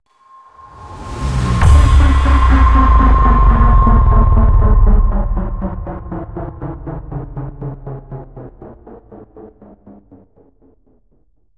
WHOOSH-HIT-FALLER
Cinematic IMPACT/BRAAM fx created with synths and various sounds.
film, impact, synth, sci-fi, fx, hit, suspense, movie, cinematic, dramatic, sound-design